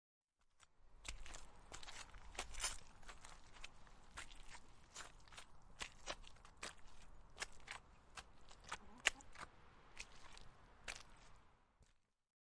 1 Walking in the mud
Walking in the mud